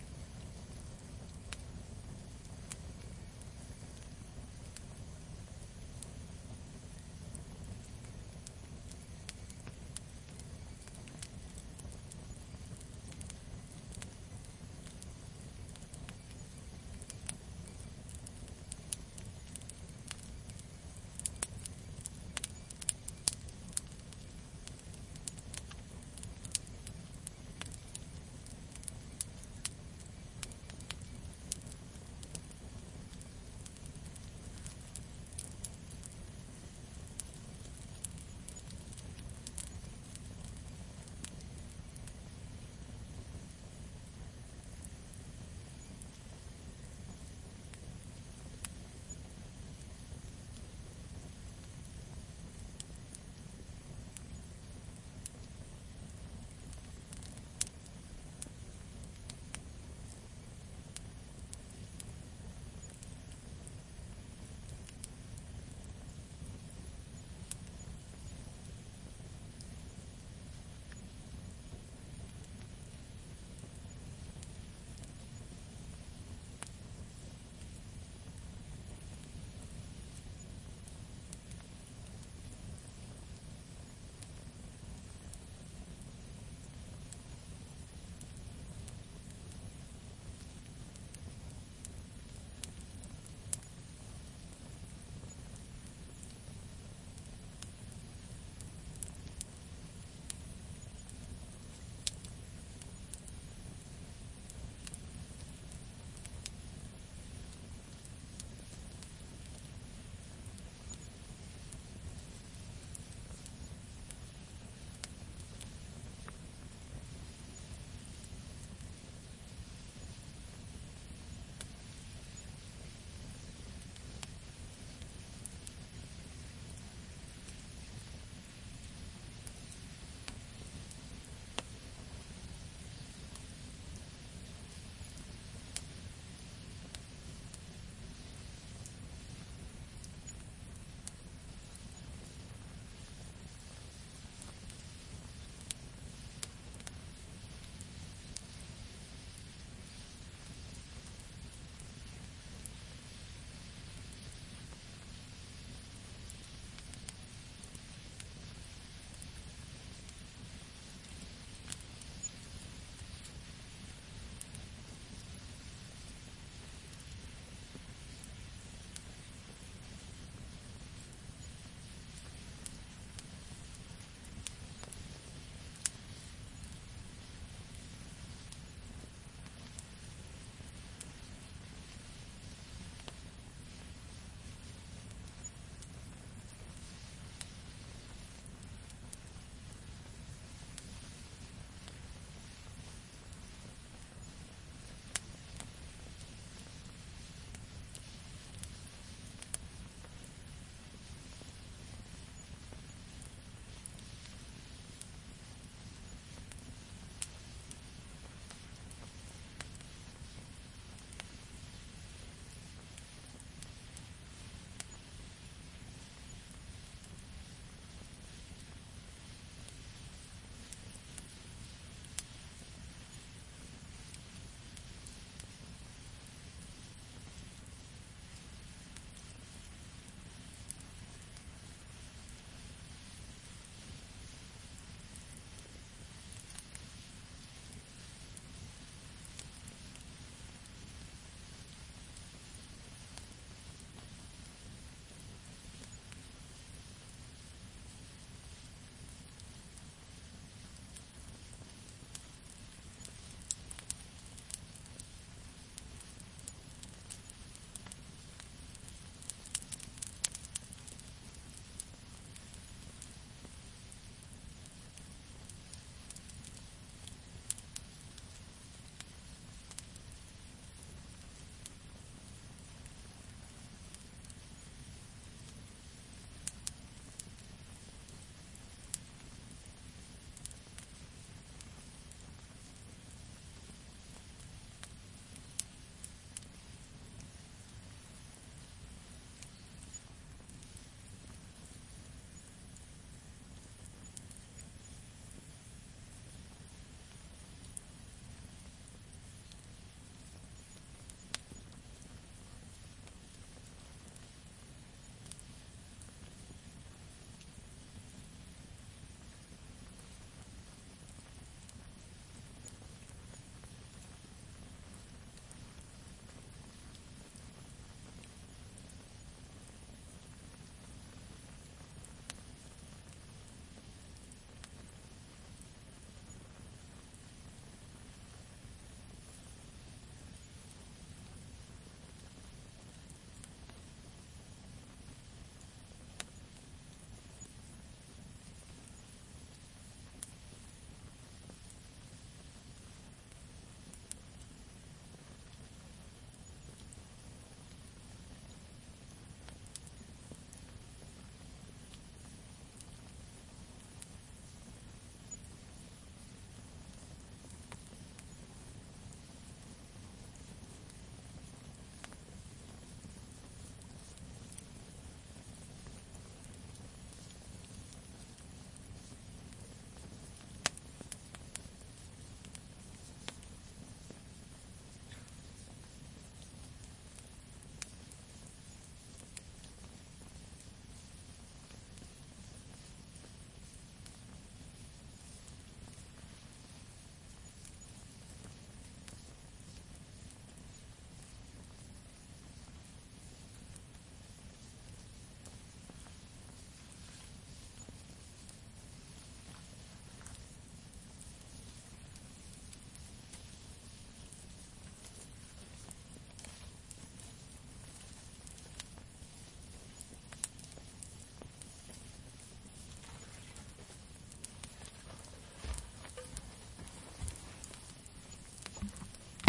campfire in the woods rear
campfire, field-recording, forest, woods